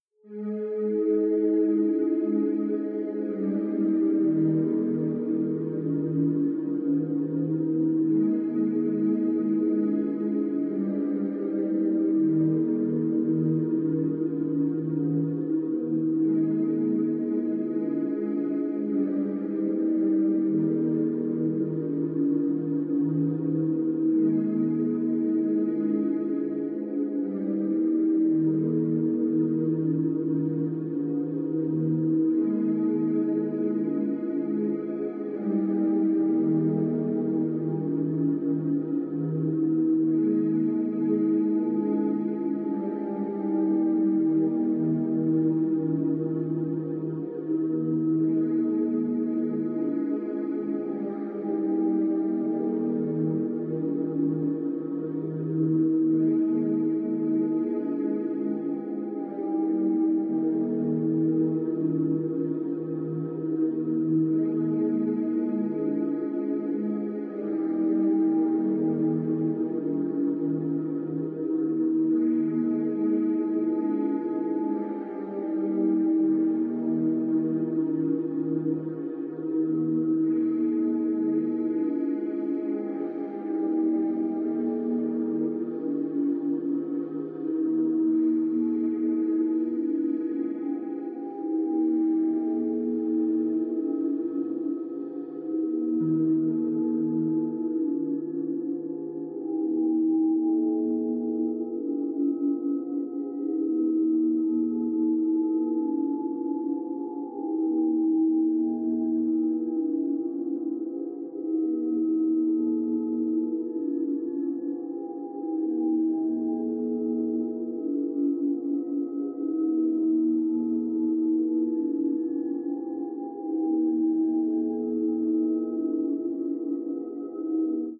Mystic Minimalistic Loop
Mystyc minimalistic loop
Please check up my commercial portfolio.
Your visits and listens will cheer me up!
Thank you.
loop, electronic, electronica, minimal, minimalistic